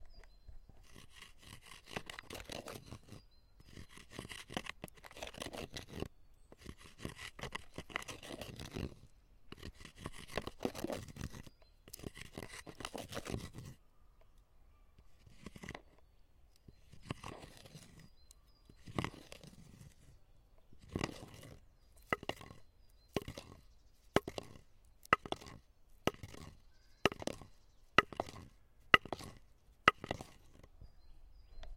A piece of wood is rubbed and then banged on another piece of wood